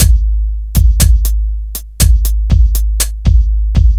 120GHroove 60BPM SUB
120BPM loop made with HAMMERHEAD.
loop, drum-loop, rhythm, 120-bpm, beat, percussion-loop